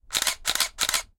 Three Camera Clicks
Taking three continuous shots on a Canon EOS 2000D DSLR camera. Voice Record Pro with Pixel 6 internal mics > Adobe Audition.
camera, canon, click, close, dslr, eos-200d, motor, photo, photography, picture, shutter, slr, snap